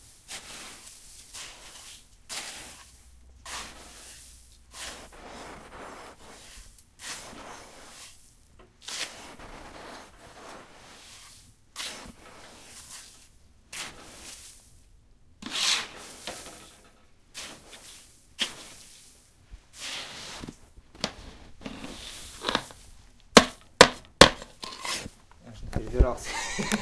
Clean sound of clean snow. Recorded in Russia on Zoom H2.
winter, snow, russia